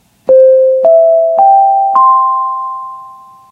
Tannoy chime 02
An imitation of a chime you might hear before an announcement is made.
announcement, chime, melody